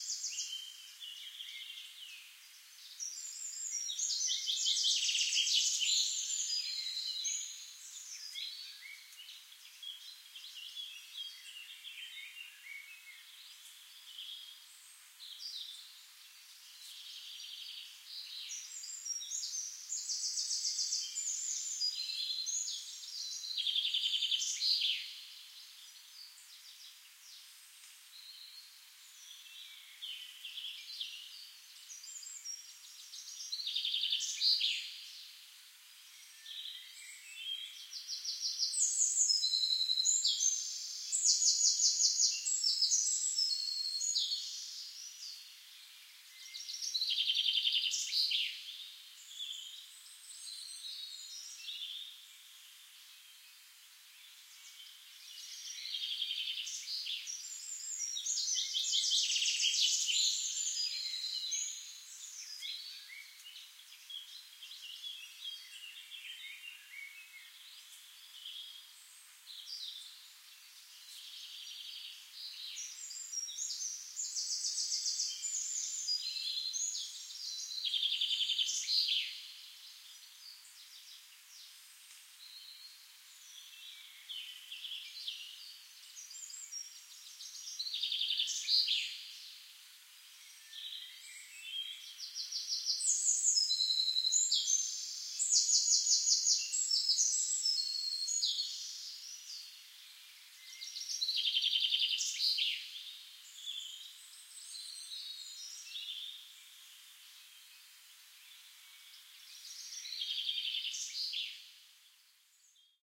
Birds In Forest (Scotland)
Birds in the forest. Peaceful wildlife ambience forest in Scotland, with birds calling and wind on trees / Ambiente